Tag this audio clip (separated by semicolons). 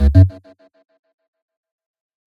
button; switch; ui